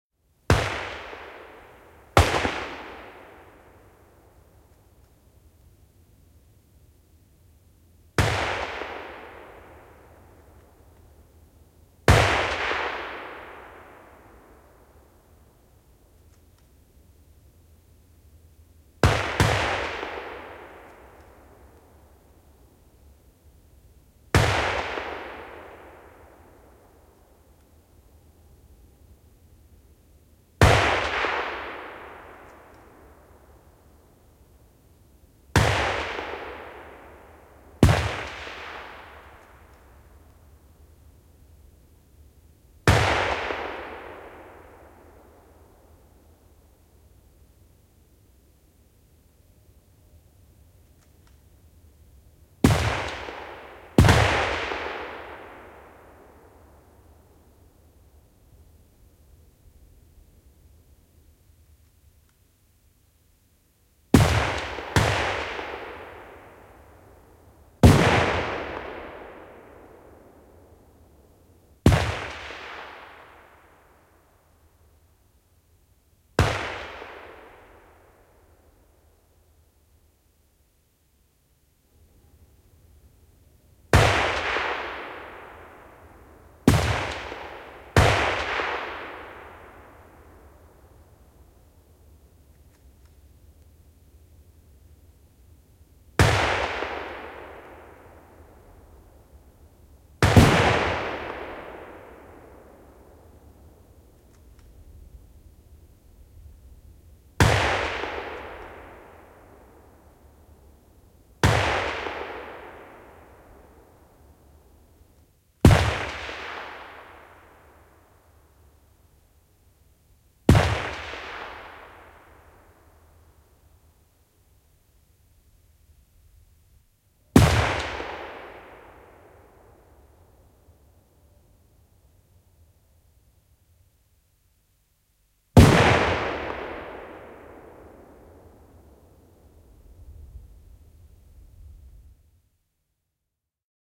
Kivääri, kaikuvia laukauksia ulkona, kooste / A rifle or two, echoing, solid shots, exterior, mix
Kiväärillä, välillä kahdella, ammutaan kauempana, kaikua. Paikoin heikkoja latausääniä ja osumia.
Äänitetty / Rec: Kooste arkiston analogisilta nauhoilta / Mix based on archive's analogical tape material
Paikka/Place: Yle Finland / Tehostearkisto / Soundfx-archive
Aika/Date: 1980-luku / 1980s